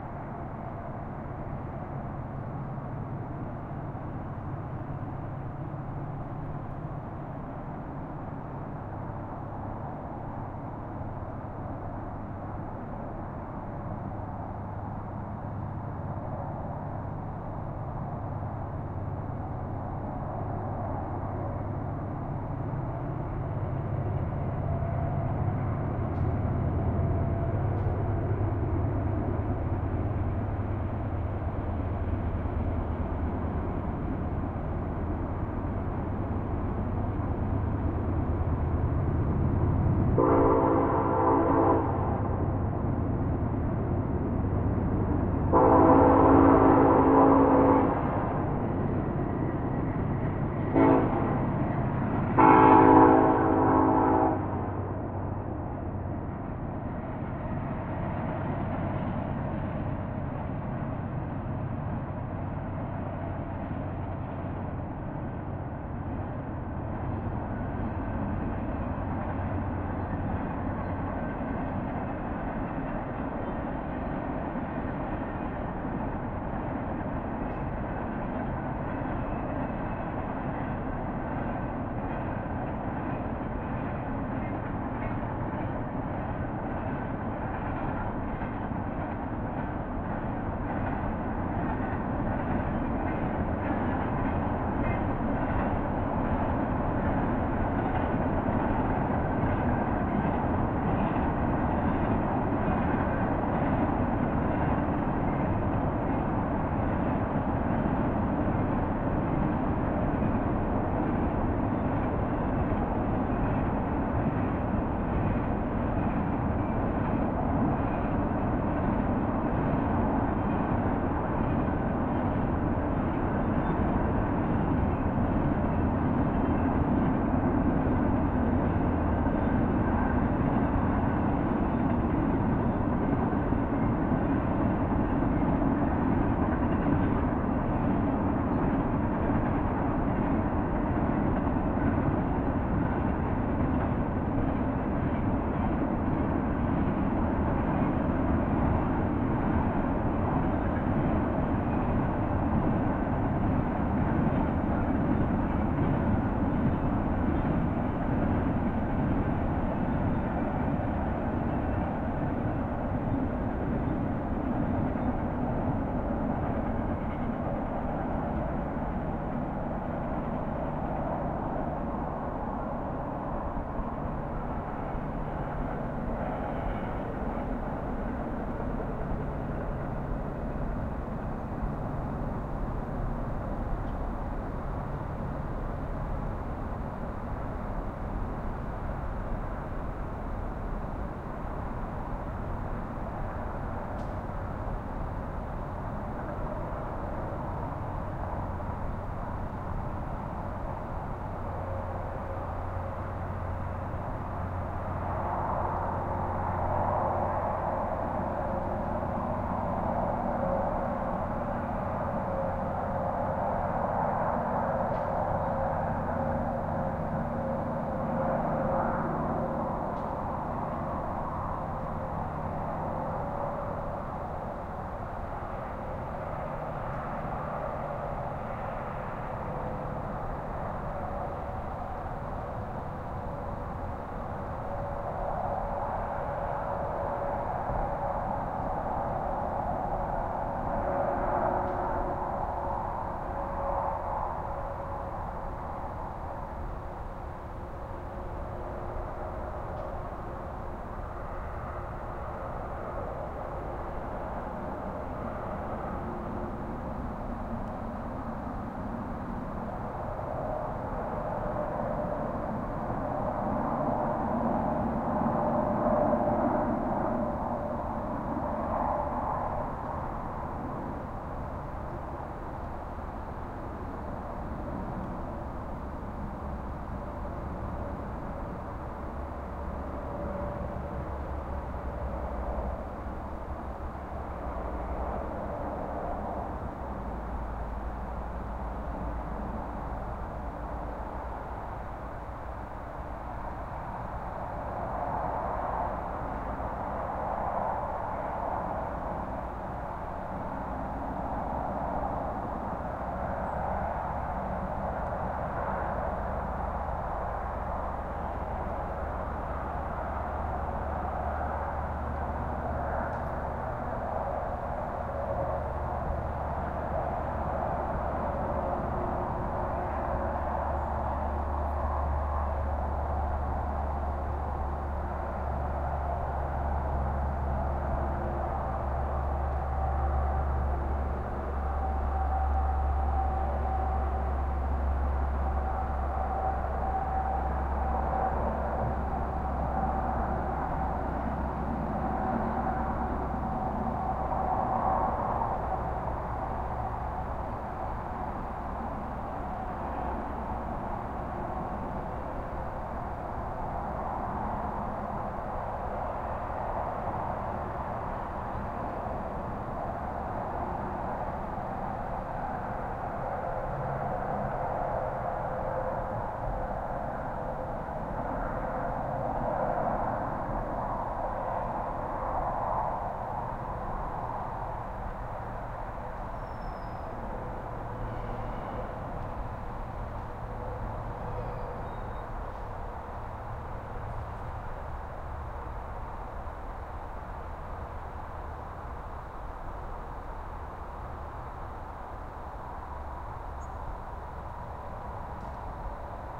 skyline distant highway far haze from campground night3 +freight train pass by with horn toots at beginning
campground, distant, far, from, haze, highway, night, skyline